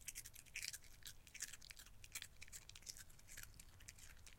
The sound of a small dog (Specifically, a miniature poodle) crunching and munching on cheese crackers with a good sound. This could be used for alien sounds, or any kind of munching. Or you know, if you have the need for a small dog eating crackers, go for it.